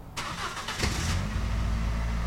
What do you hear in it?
Car start
sounds, car, automobile